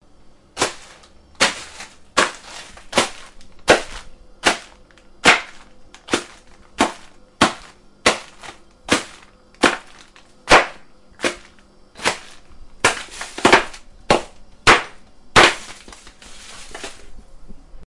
Ice Crash
Crashing of ice
Crash, Crashing-ice, Ice